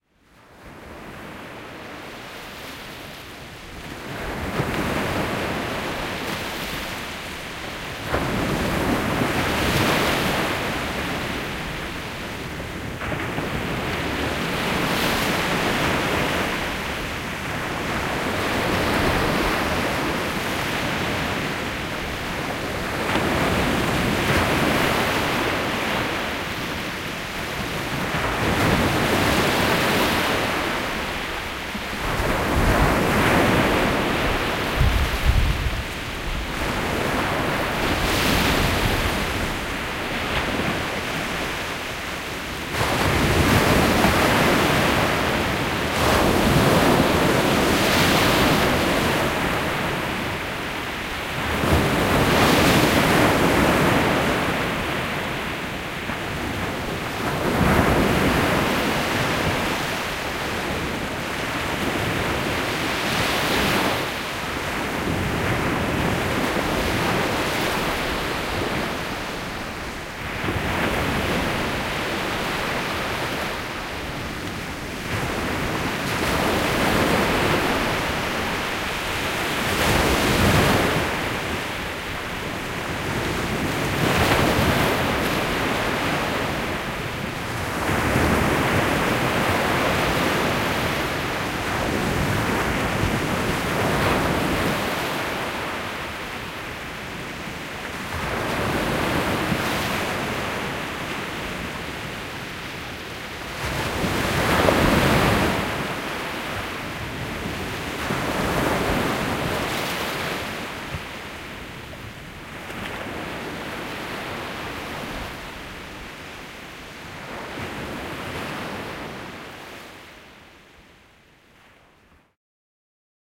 Recording of the rough Baltic Sea, taken in the evening in Rowy, Poland.